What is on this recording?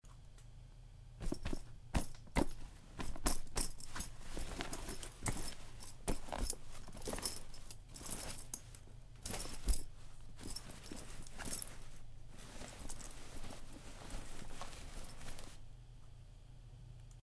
Someone frisking a person.